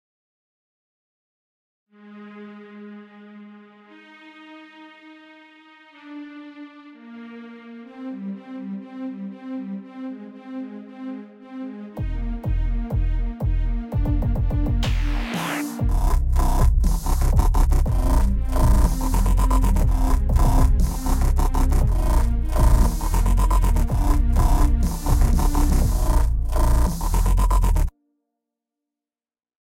Official Here We Rise Sound Track
This is the official soundtrack used in the game trailer for my game Here We Rise, which you can find a link to bellow:
Please be very supportive of this game :)